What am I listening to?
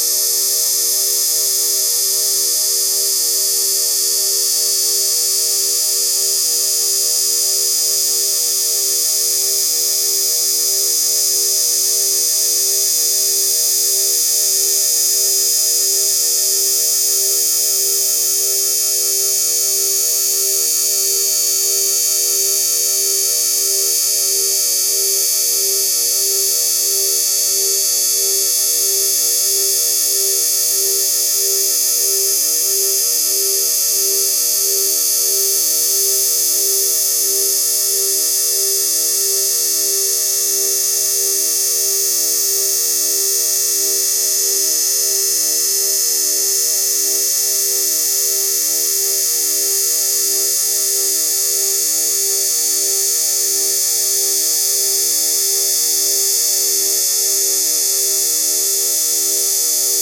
3x256 500k reso 20000hz y freq float 1pointfloat
Sound created from using the rings of Saturn as a spectral source to a series of filters.
The ring spectrogram was divided into three color planes, and the color intensity values were transformed into resonant filter cutoff frequencies. In essence one filter unit (per color plane) has 256 sounds playing simultaneously. The individual filters are placed along the x-axis so, that the stereo image consists of 256 steps from left to right.
In this sound of the series the spectrum was compressed to a range of 20 - 20000 hz. A small variation in certain divider factor per color plane is introduced for a slight chorus like effect.
chorus-effect, experimental, fft, filter, noise, planet, resonance, resynthesis, saturn, space